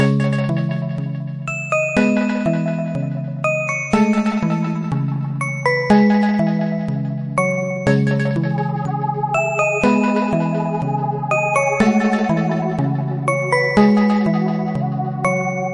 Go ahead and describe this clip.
Trap tone
I Created to play synth,Hope usable for you
beat, dance, melody, synth, techno, Trap